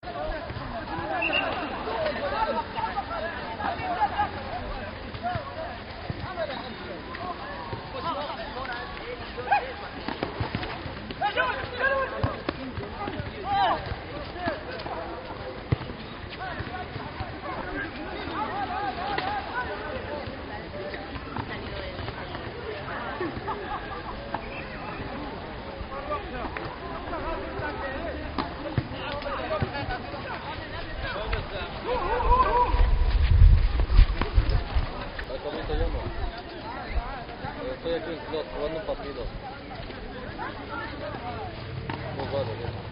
partido en el clot de la mel
Sound recorded by Maria Jose Arraiza in the Clot de la Mel,
North African youth are coming together to play a football game, the clot former industrial area picking up workers from other communities now collects and receives workers from other countries.
A park where various cultures live daily.
Recorded with a Zoom H1 recorder.
Arab, cheers, competition, elsodelescultures, football, game, goal, match, north-African, park, shouting, soccer, sport